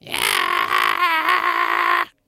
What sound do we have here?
Bad Singer (male) - Mal cantante (masculino)
I recorded some funny voices from friends for a job.
Grabé algunas voces graciosas con unos amigos para un trabajo.
GEAR: Cheap condenser mic/presonus tube.
EQUIPO: Micro de condensador barato/presonus tube.
cantando, voice, male, dumb, voz, masculino